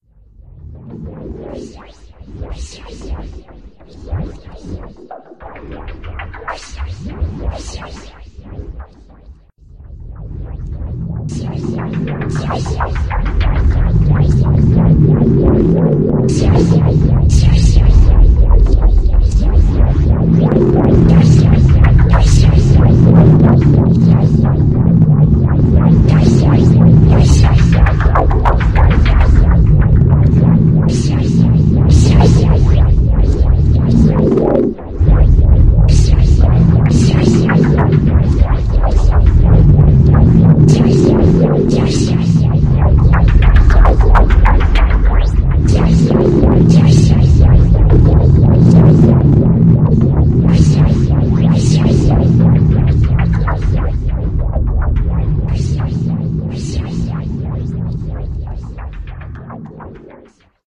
Hidden Track #22
All sounds and samples are remixed by me. A idea would be using this sound as a hidden track that can be heard on some artists music albums. e.g. Marilyn Manson.
alien, ambience, atmosphere, distortion, effect, electric, electronic, end, experimental, hidden, noise, processed, sample, track, vocoder, voice, weird